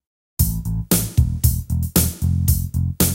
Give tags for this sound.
basic
cool
drums

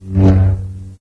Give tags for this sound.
lightsaber
star
wars

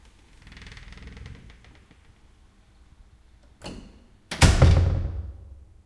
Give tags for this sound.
closing
creek
klonk
lock
Door

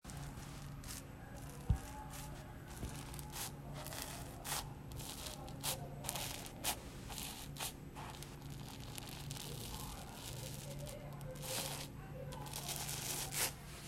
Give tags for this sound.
sponge
squeeze
squish

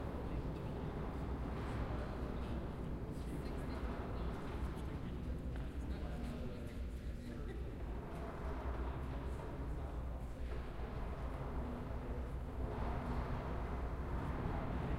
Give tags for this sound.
afternoon
indoor
reverberant

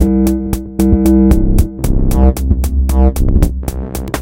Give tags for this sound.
electro
114-bpm
drumloop
bass

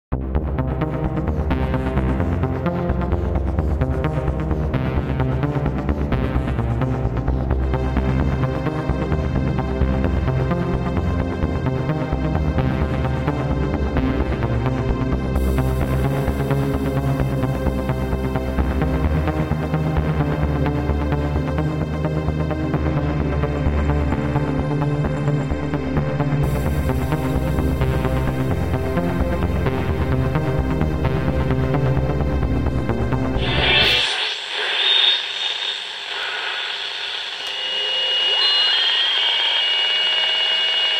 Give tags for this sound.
future
radio
sounds
space
star
SUN
wave

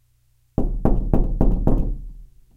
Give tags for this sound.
door
knock
wooden-door
wooden
knocks
hit
heavy
hand
rattle

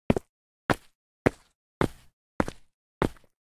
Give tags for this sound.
concrete
field-recording
footstep
footsteps
run
step
stone
surface
walk

